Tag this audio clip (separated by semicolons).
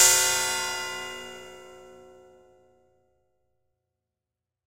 tb-303; drums; softsynth; realism; percussion; cymbal; pro; abl